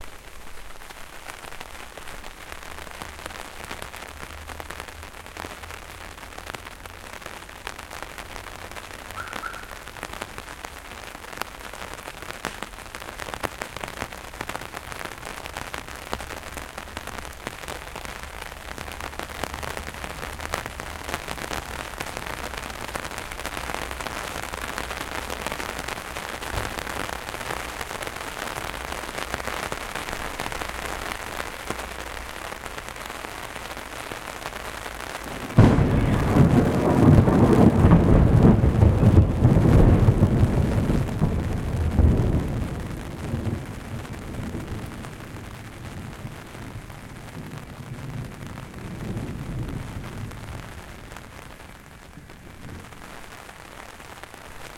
Rain on umbrella
field-recording, rain